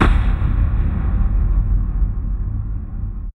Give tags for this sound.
detonation; blast; boom; explosion; timpani; bass; effects; soundtrack; Veiler; eruption; percussion; outbreak; burst; Sword; documentary

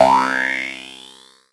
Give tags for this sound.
Effect; Cartoon; Poing; SFX; Sound

